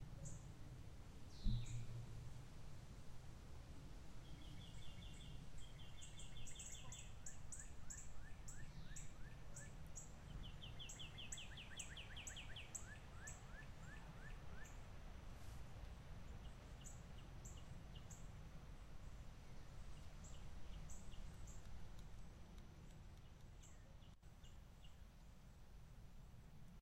Just some chill outside birds